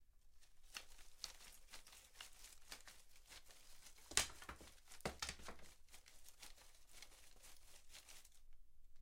Sticks rustling
Sticks bundled together and jostled in hands. Recorded indoors with AudioTechnica Condenser microphone